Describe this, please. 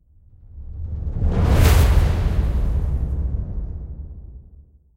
Jumpscare sound/buildup
A sound to use in a game
Made with Native Instrument's Rise And Fall plugin
scary, dark, creepy, tension, jumpscare, horror, suspense